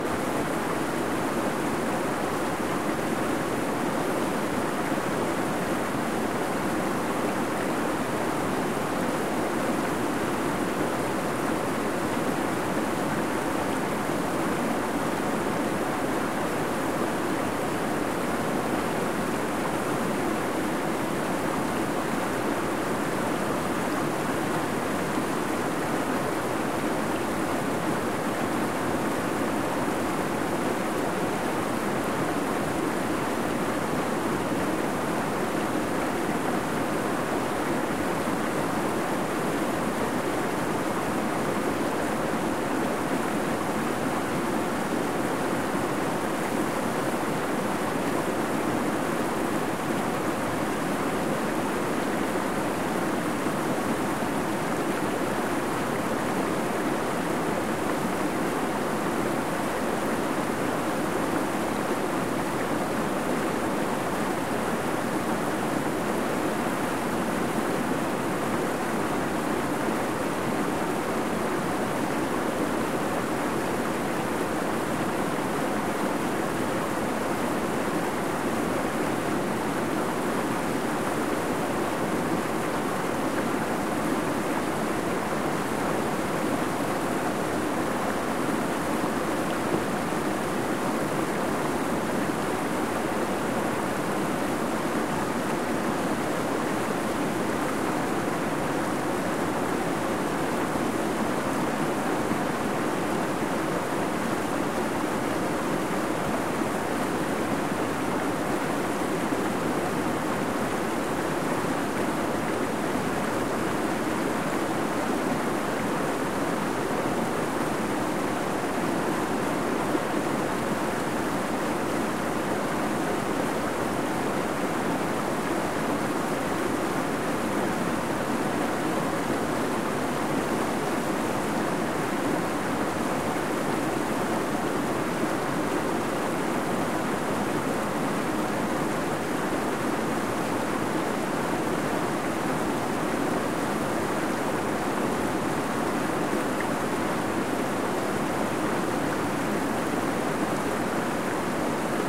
water flow
Moving water. Just use your imagination what this can be.
Recorder: Zoom H4n (combined two layers of my recording for better sound)
ambient, atmospheric, field, field-recording, flow, foley, hq, liquid, nature, rain, recording, river, stream, water, zoomh4n